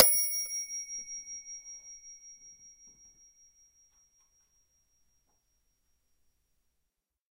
metronom pling
Mechanical metronome click with bell. Recorded on an Edirol R-09 with built-in mics.